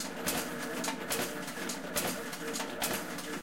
Machine loop 02
Various loops from a range of office, factory and industrial machinery. Useful background SFX loops
machine, machinery, sfx, industrial, plant, print, factory, loop, office